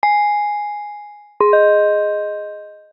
FM Elevator bell
THE Elevator bell of all bells
effect, bell, elevator